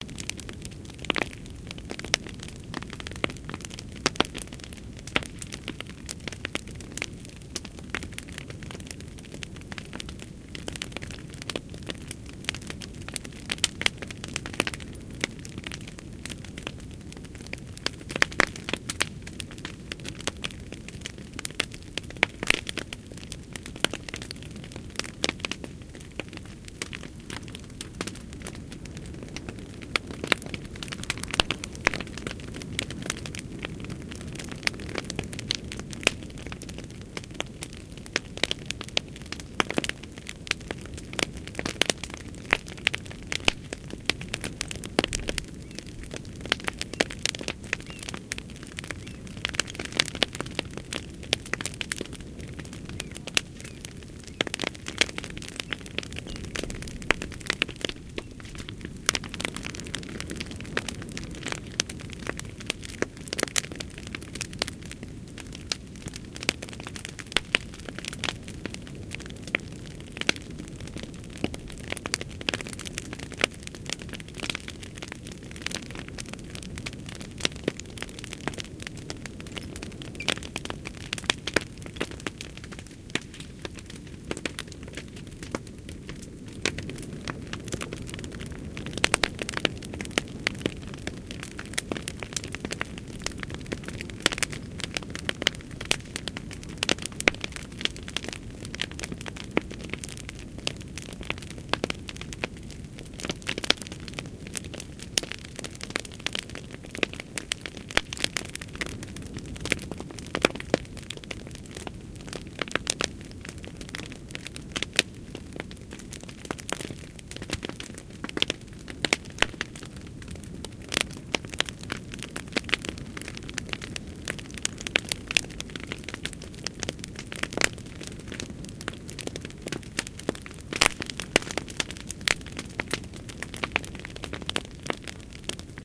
Wooden fire
A field recording of an actual fire in wood (branches, planks, etc)outdoors in summer. Recorded with a Sony videocamera (Mini-DV) and a separate stereo-mike, Sony ECM-MS 907
popping, Field, Fire, recording